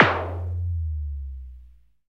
Sys100snare2
Exotic Electronic Percussion42
electronic, percussion, exotic